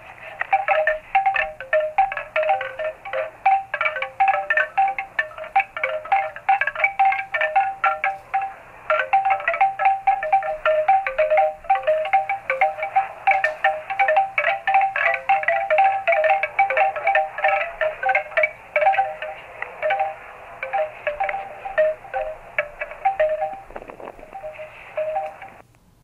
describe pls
The famous chime noise recorded on the old Olympus V-90 played live and recorded with DS-40.